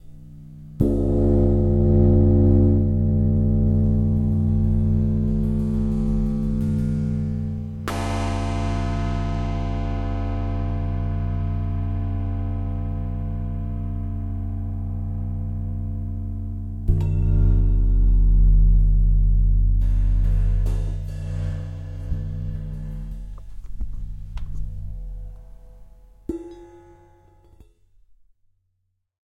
Cymbal Drone Close-Mic 2
Beautiful metallic textures made by recording the sustain sound of a cymbal after it has been it. Recorded in XY-Stereo with Rode NT4 and Zoom H4 Handy Recorder.
alloy, ambient, close-mic, crash, cymbal, disharmonics, drone, drum, drums, hit, iron, metal, metallic, ride, rumbling, stereo